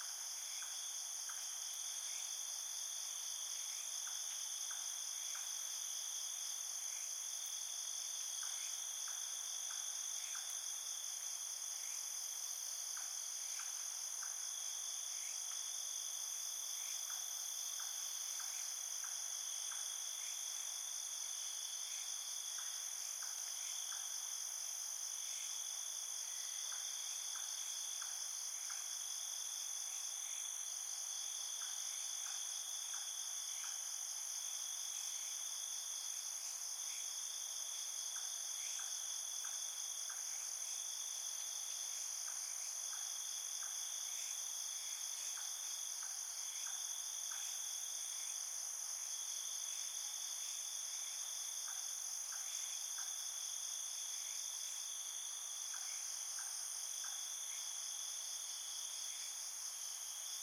AMBIENCE NIGHT FIELD CRICKET 01
Crickets chirping around midnight.
ambience, chirp, cricket, field-recording, forest, insects, jungle, nature, night